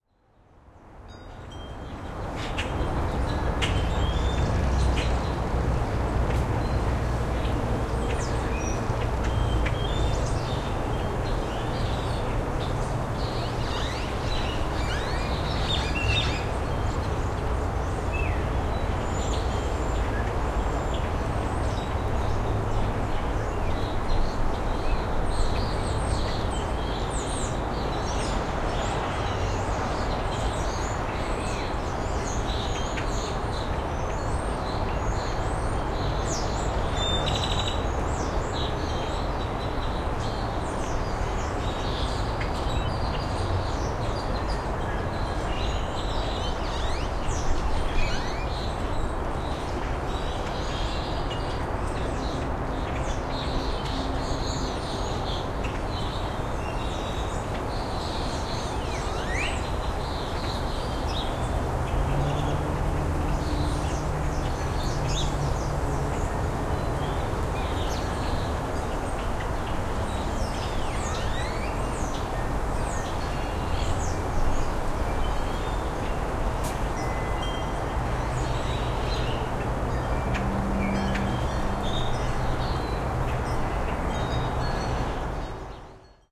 windy porch morning A
Breezy morning on my old porch. Highway noise, birds, and windchimes. Recorded with a Rode NT4 Mic into a Sound Devices Mixpre preamp into a Sony Hi-Md recorder. Transferred Digitally to Cubase For Editing.
austin, traffic, city, birds, chimes